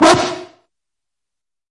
Synth. Processed in Lmms by applying effects.